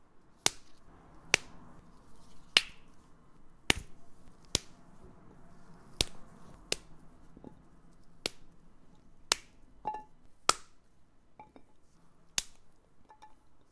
Foley used as sound effects for my audio drama, The Saga of the European King. Enjoy and credit to Tom McNally.
This is a succession of sounds of me snapping raw carrots by hand. They make a sickening crunching sound that can be useful for SFX of breakages and gore. The track needs some cleaning up as some traffic sounds are audible in the background.